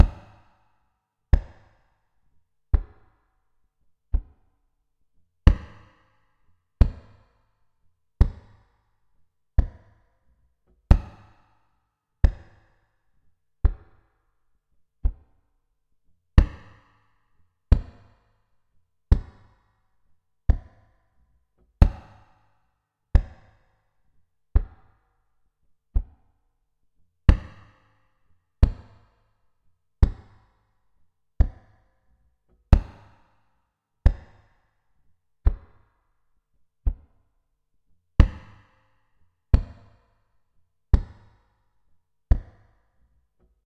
16 Bar Guitar Kick with Reverb at 88bpm
(use PO-12 018)